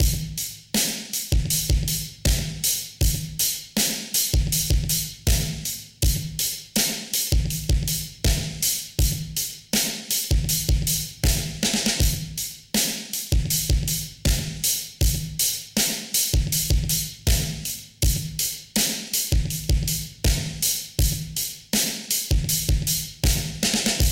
Created on Ableton.
Used NI Studio Drummer Garage Kit w/custom effect rack to give it a gritty, tape-y sound.
Do with it as you please.
drum-loop, amen-break, hip-hop, 80-bpm, lo-fi, drums, loop